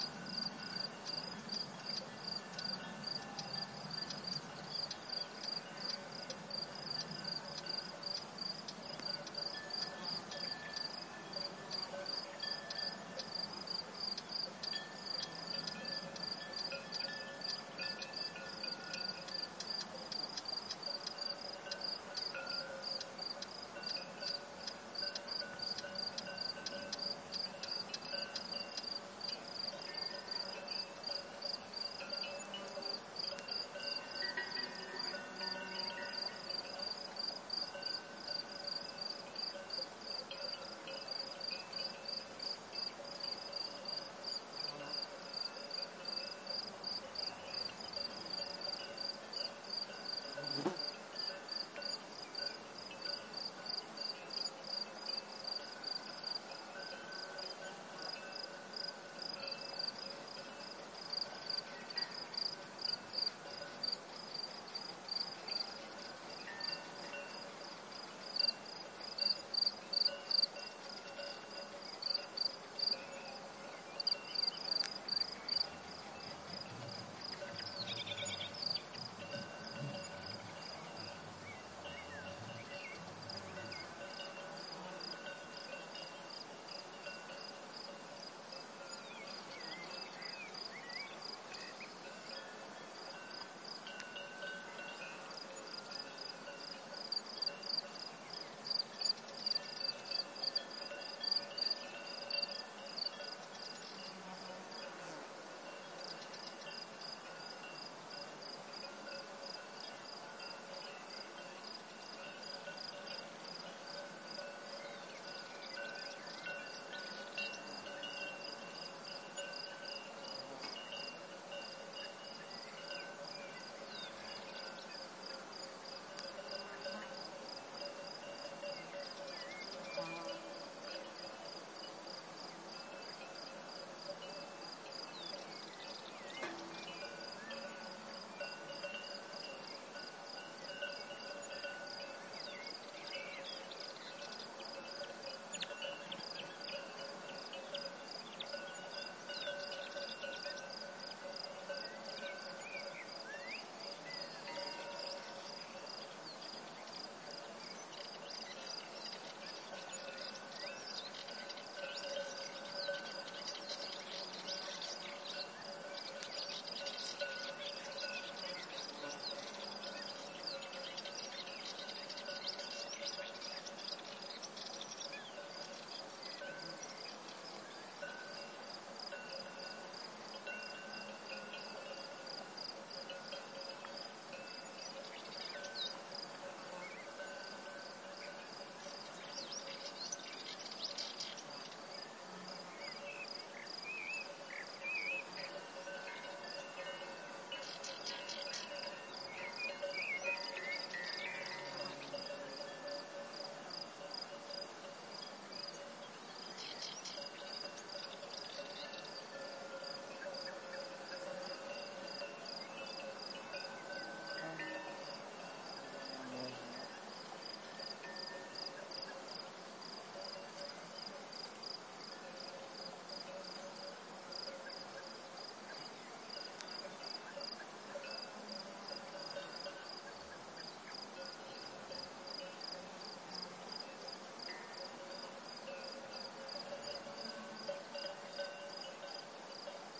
Peaceful sunset ambiance with crickets, birds and cowbells. Primo EM172 capsules inside widscreens, FEL Microphone Amplifier BMA2, PCM-M10 recorder. Near Aceña de la Borrega, Caceres province (Extremadura, Spain)